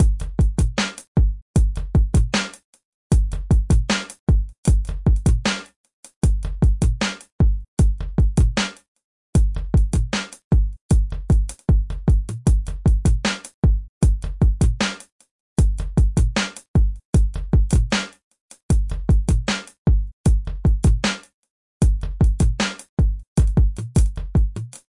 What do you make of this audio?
hip hop beat 5
Hip hop beat made using:
Reason 9.5
M-Audio Axiom 49 drum pads
beat, beats, drum-loop, drums, hip, hiphop, hop, loop, loops, rap